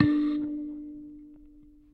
44kElectricKalimba - K4clean
Tones from a small electric kalimba (thumb-piano) played with healthy distortion through a miniature amplifier.
amp, bleep, blip, bloop, contact-mic, electric, kalimba, mbira, piezo, thumb-piano, tines, tone